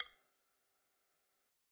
Sticks of God 004
drum, drumkit, god, real, stick